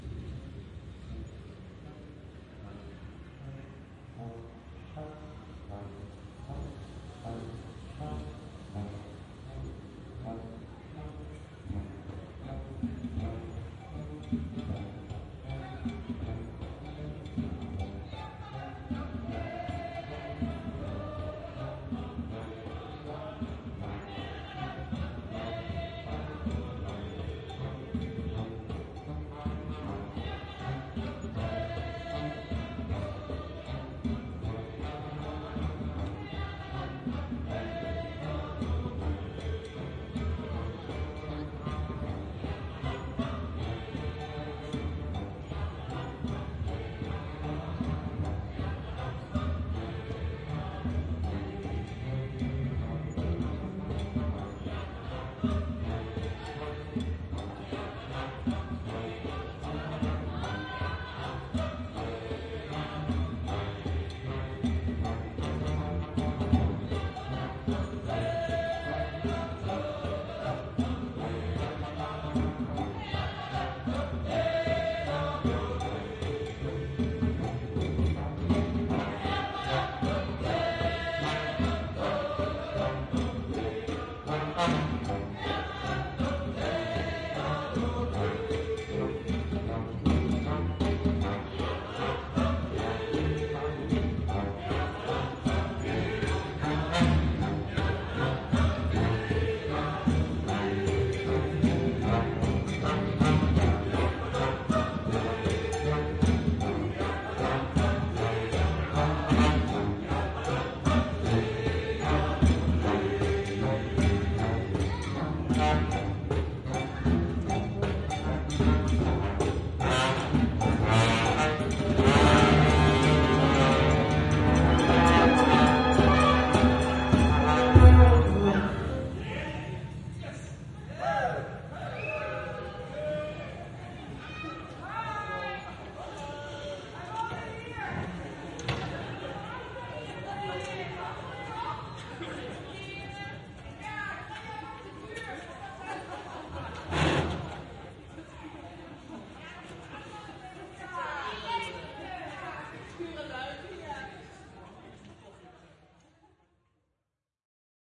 music from window
an approaching group of amateur musicians playing and singing on the street, captured from a window on the 3rd floor.
EM172-> TC SK48.
live-music, music, live, city, Amsterdam, Dutch, street, evening